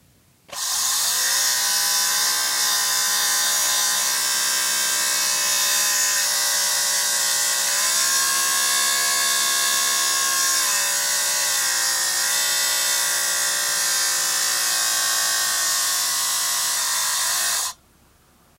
electric lint remover
The very annoying sound of a 'JML BobbleOff' battery-powered lint remover. This noise is the sound of its motor running, which produces a buzzing sound.